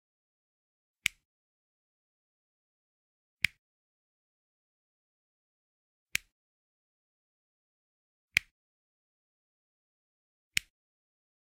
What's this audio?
A small electric appliance switch, on and off.